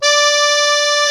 real master accordeon
hohner, master